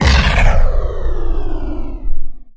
Digitally created drop of a heavy bag on slippery surface :). For use in jingles